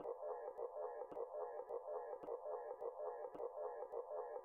Abstract tape/record loop sound.